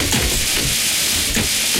glitch SFX 056
As all files in this sound pack it is made digitally, so the source material was not a recorded real sound but synthesized sequence tweaked with effects like bitcrushing, pitch shifting, reverb and a lot more. You can easily loop/ duplicate them in a row in your preferred audio-editor or DAW if you think they are too short for your use.